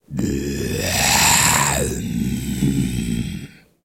Zombie tries to talk
Inhuman creature zombie-like gasps. Zombie voices acted and recorded by me. Using Yamaha pocketrak W24.
inhuman, breath, snort, undead, brute, male, zombie, chock, horror, beast, gasps, moan